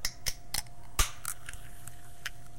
Opening a pop can, recorded with mini-disc.